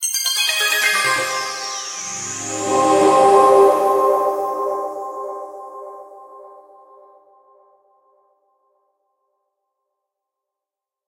Logo Bumper
Just got bored and made this generic bumper styled thing in Ableton.
Sci-fi, Space, Retro, SciFi, 80s, Sound, Spacious, Future, Zega, Ominous, Audio, Logo-Bumper